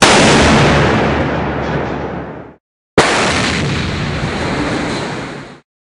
Railgun - Extremely LOUD Cannon
army, experimental, explosion, explosive, magnetic, military, projectile, railgun